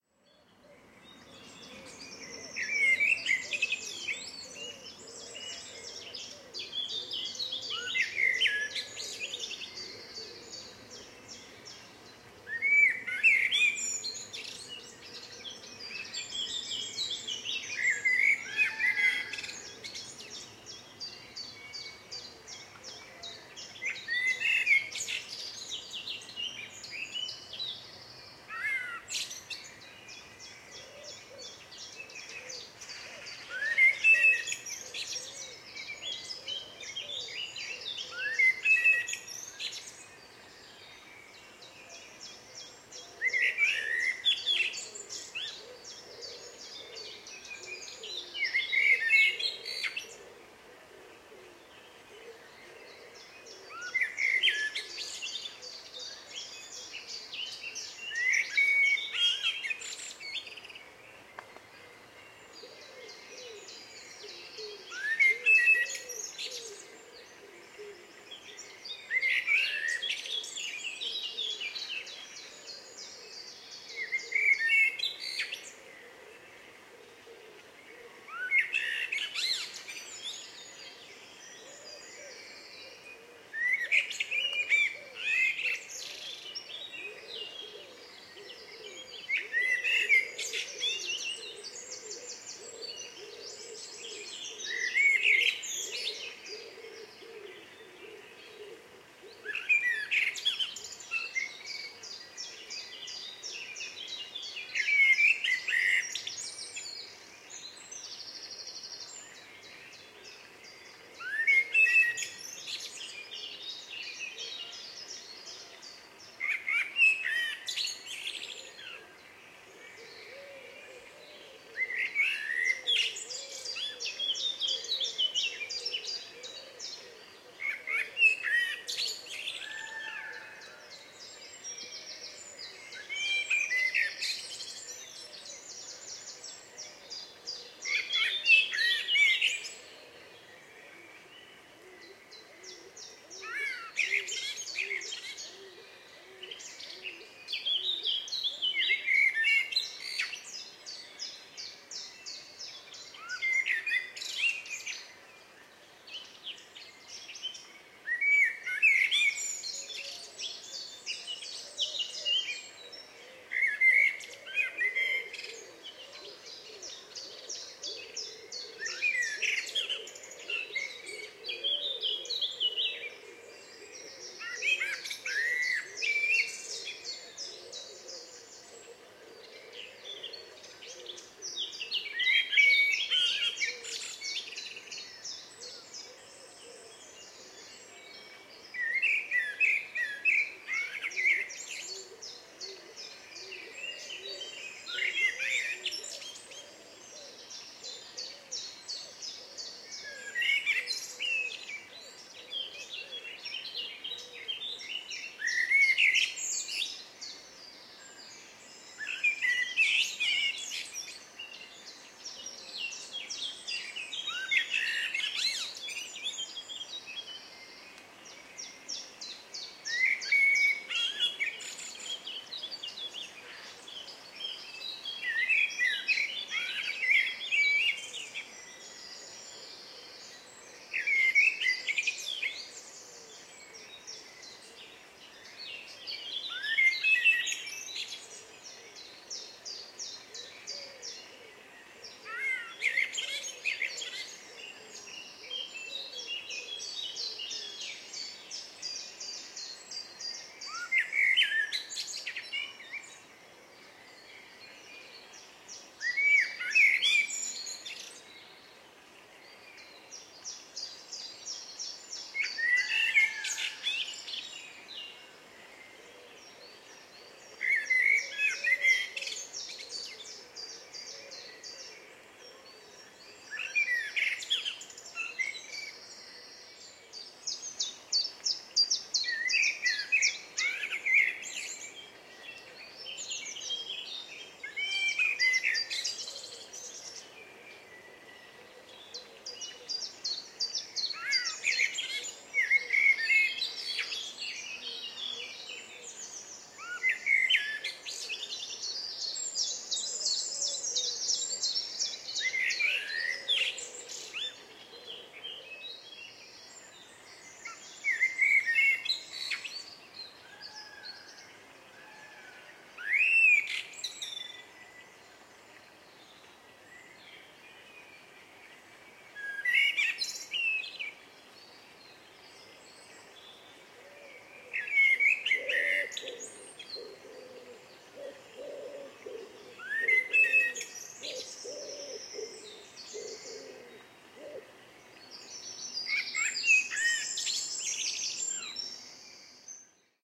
Soundscape Featuring Blackbird
A blackbird recorded at Phyllis Currie Nature Reserve in Essex, UK. There are many birds in the background, including chiffchaff, wren, Indian peafowl (peacock), woodpigeon, blackcap, with pheasant and magpie making brief appearances.
Recorded with Sennheiser K6/ME66 attached to a Zoom H5. No noise reduction. The only editing was to cut aircraft noise. This was done with Audacity.
nature, birds, blackbird, ambient, birdsong, soundscape, field-recording